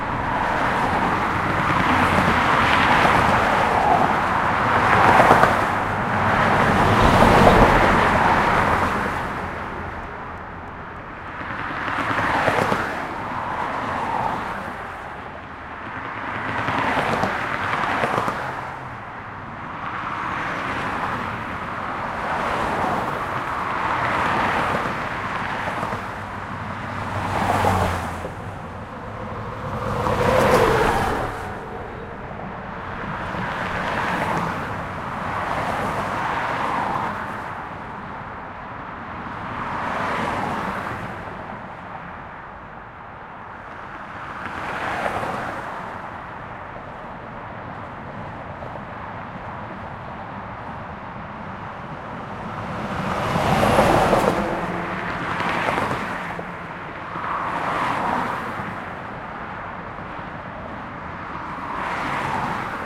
Up-close recording of a highway in Ventura, California.